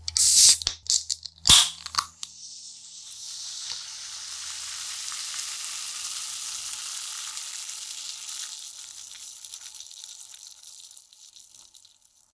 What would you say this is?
Opening soda can
a recording of me opening a soda can including the fizzing
Recorded with Sony HDR PJ260V then edited using Audacity